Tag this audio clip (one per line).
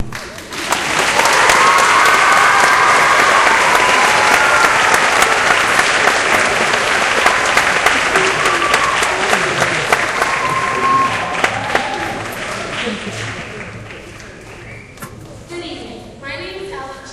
audience
applause
auditorium
crowd